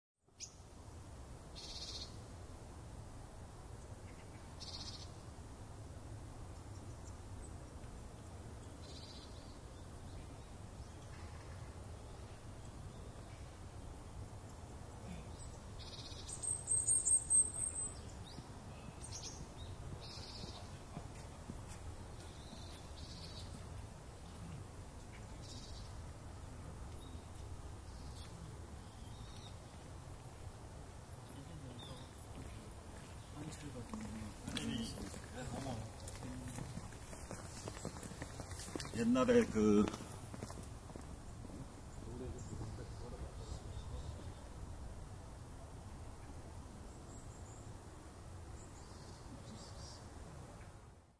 Birds and some people walking and talking
20120116